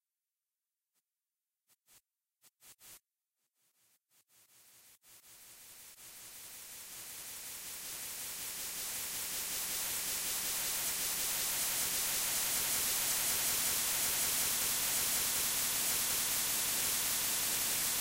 Organic or industrial noise effect... All sounds were synthesized from scratch.
insects, sfx, fx, minimal, hollow, raw, minimalistic, noise, dry, atmosphere, silence